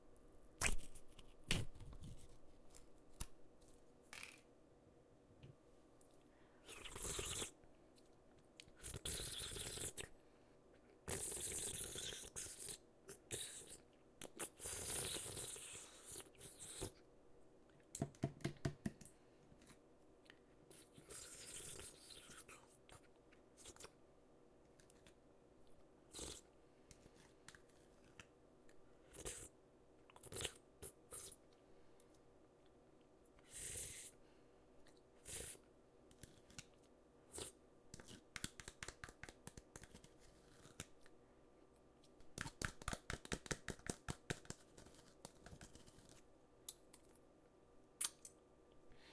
Slurping Applesauce
A field recording of a cup of applesauce being opened and slurped down.
slurping, spoon